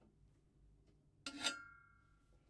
Just someone scratching metal
Hit, Impact, Metal, Scratching, Sword, Sword-hit, Sword-metal